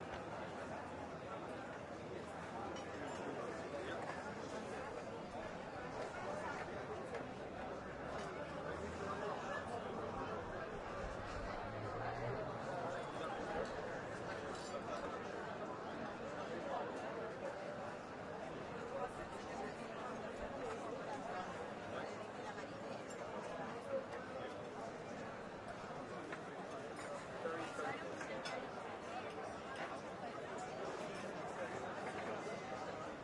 ristorante all'aperto
germania, ristorante, nature, sounds, foley, efx, sound